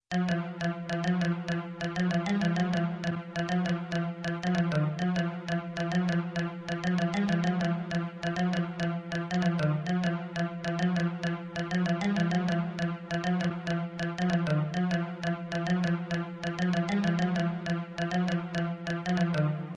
Suspence background
this is just a simple background beat that can be used to add to a song. I made it on my piano.